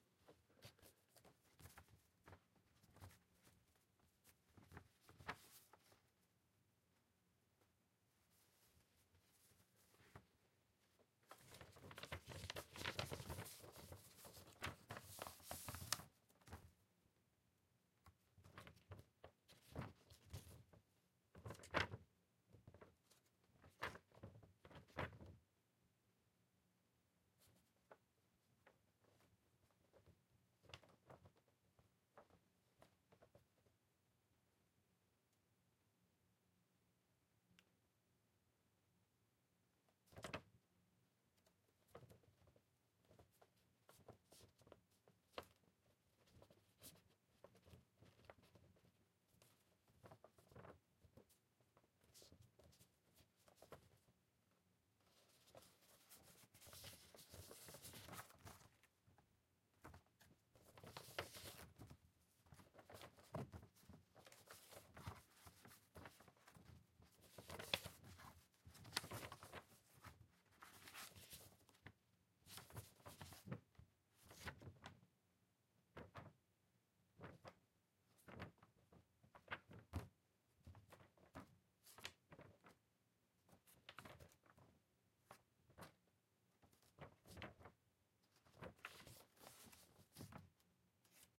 Recorded with Zoom H1. Be my guest and use it as much as you can.